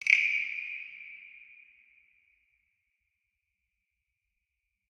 Monofrosch mit Hall
Made with a percussion-instrument called 'Holzfrosch', a Guiro-like frog. With lots of (mono-)reverb.
Instrument, Percussion, Small, Wooden